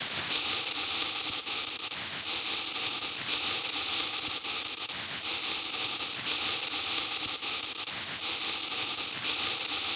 Noise FX short 2
3, Modular, Nordlead, Synth